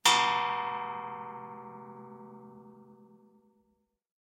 metal clang 01
a piece of suspended sheet-metal struck with a wood stick. Fairly disharmonious and gong-like